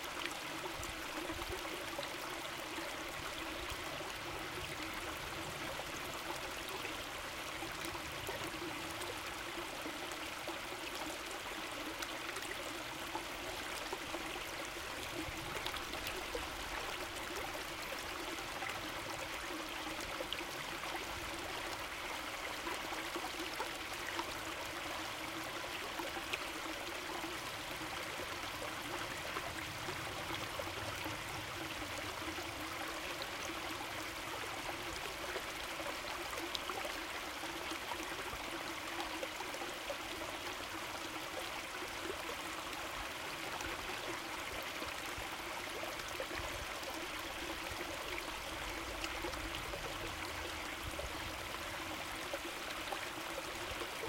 Stream-Brook
Recorded with shotgun mic approx 1 foot from surface of stream.
babbling, brook, field-recording, splash, stream, water